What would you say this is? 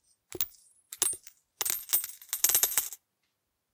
Pop some tissue and a woolly hat in a bowl, pop that in the sound booth next to the mic and let your coins drop. Then edit that baby - cut out the gaps that are too far apart until the impacts of the coins land at the time you want.